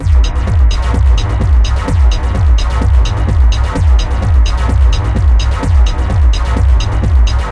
Dark Techno Sound Design 05
Dark Techno Sound Design
Dark, Design